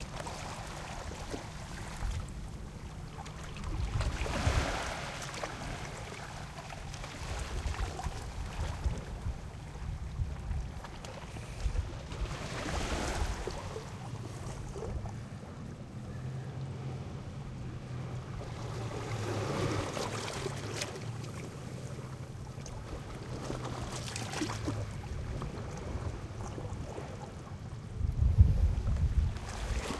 Teluk Nipah 05
Waves runnig on rocks at the beach in Pangkor Island
Splash, Water, Waves